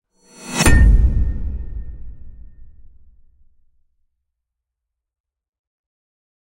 Swish hit. Metal impact(11lrs,mltprcssng)
Normal swish hit sound. Enjoy it. If it does not bother you, share links to your work where this sound was used.
boom, boomer, cinematic, effect, film, filmscore, fx, game, hit, impact, metal, motion, move, movie, riser, riser-hit, score, sfx, sound, sound-design, sounddesign, stinger, swish-hit, swoosh, trailer, transition, whoosh, woosh, woosh-hit